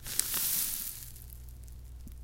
found cushion 1
Some kind of plastic cushion squeezed